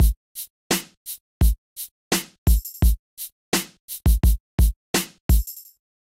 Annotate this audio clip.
Hip Hop beat By Arm SAJ 1
Hip Hop Beat 1
drums, rap, drum-loop, loop, beat, hiphop, rapbeat, bass, hiphopbeat